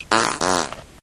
uh oh fart
fart, flatulation, flatulence, poot